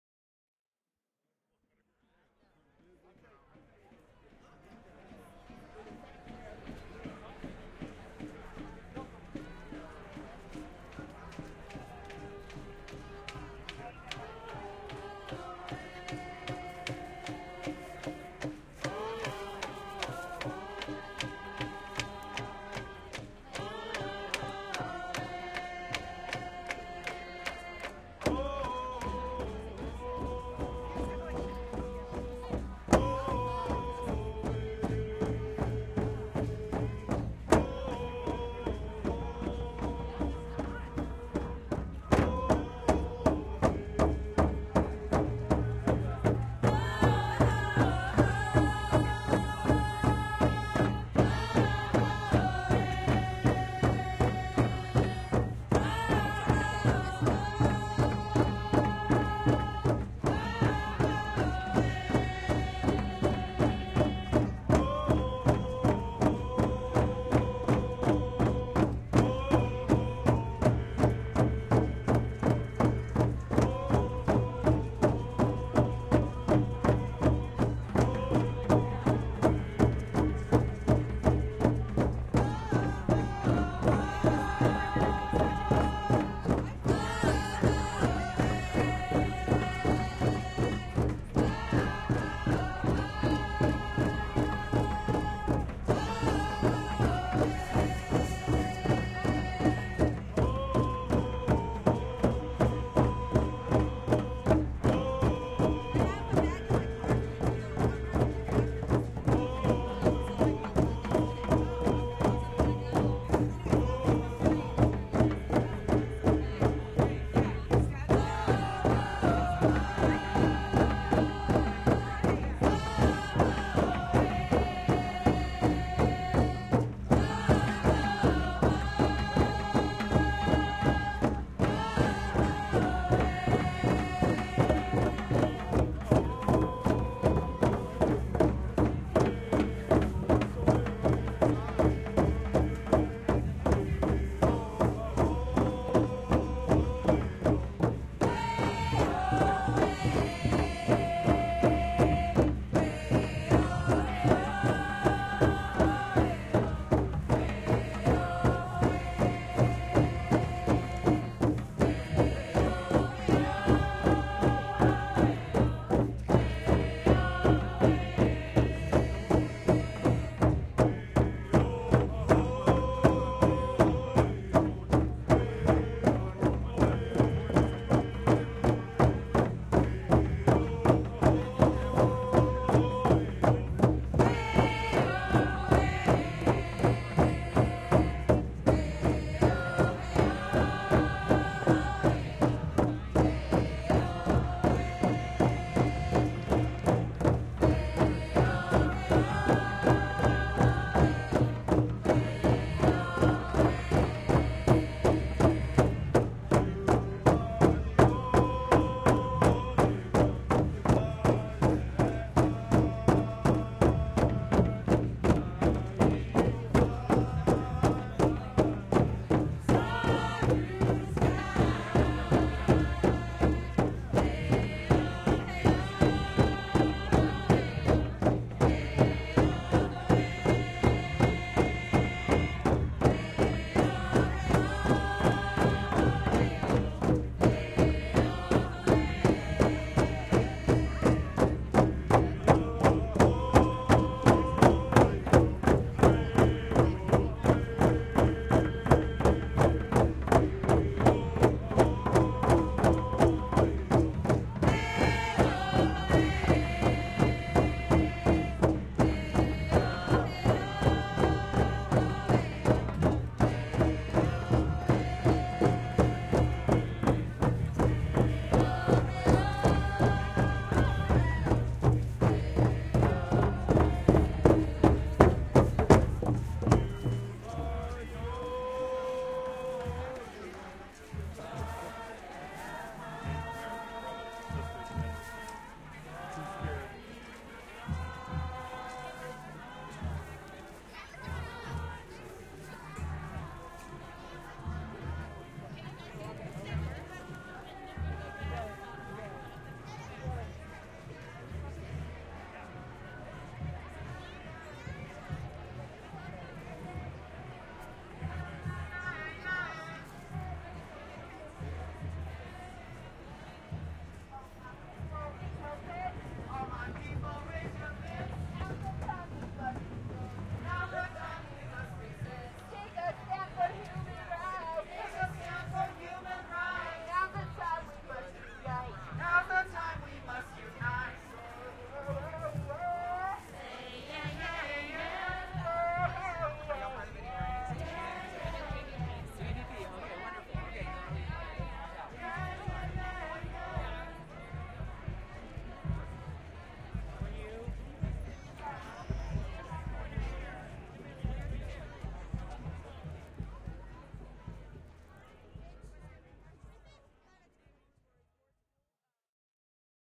Indigenous Peoples Performance @ Seattle MLK Day March
Central District, Seattle, WA
MLK Day March
2018-01-15
I believe it was a collective of Indigenous Peoples performing this music, though I would love more context/information if you have any to provide.
DPA 4060 Microphones (stereo pair, binaural) -> Sound Devices MixPre 3